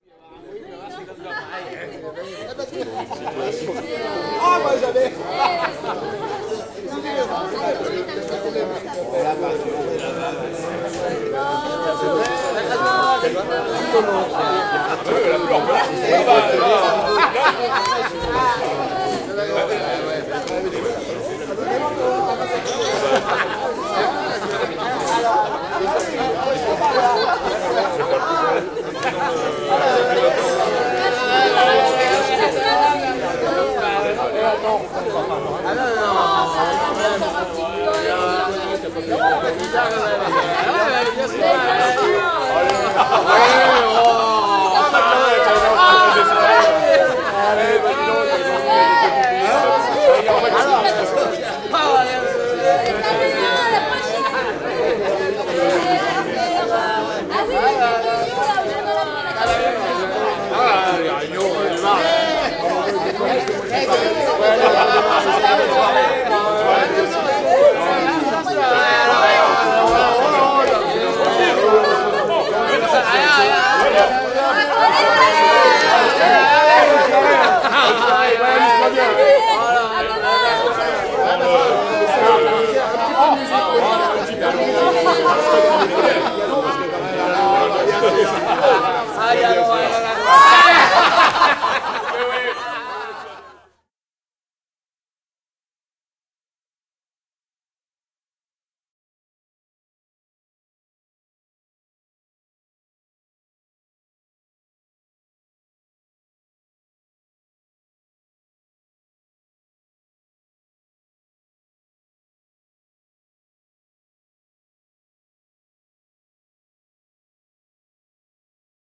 Brouhaha bistrot français / Hubbub French bar
Rires - discussions bruyantes - phrases en français
Laughter - noisy discussions - sentences in french
Enregistré avec un zoom H2, il ne s'agit pas vraiment d'un bar mais d'une dizaine de comédiens. Registered with a zoom H2, it is not really real people in a bar but about ten comedians.